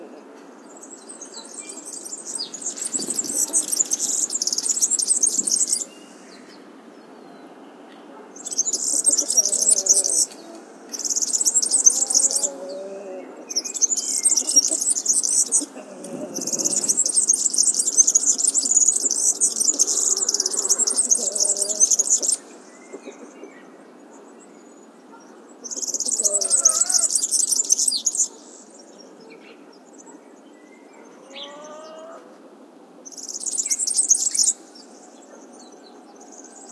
serin singing (thank you reinsamba), unprocessed. The bird perched so close to the microphone it nearly overloaded the recording. There are some soft sounds of hens in background/ un verdecillo cantando (gracias reinsamba), posado tan cerca del microfono que casi lo saturó. Suenan bajito una gallinas también